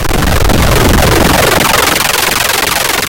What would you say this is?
Sounds like as if a rocket was barely launching.
Created using BFXR